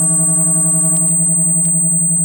A Casio CZ-101, abused to produce interesting sounding sounds and noises
glitch, cz101, alias, digital, cosmo, synthesizer, 12bit, crunchy, casio, cz